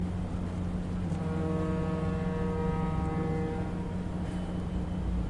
The Staten Island Ferry horn from a distance, New York City
new-york, nyc, field-recording, port, ship, harbor, boat, horn, staten-island, engine, ferry